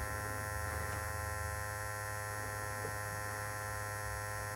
Short higher pitched sound effect of a vibrator (sex toy) buzzing and vibrating.